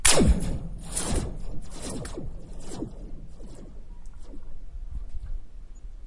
Laser one
Metallic laser sound with quite a long echo. Made with a metal Springy. Recorded indoors, with Zoom H4.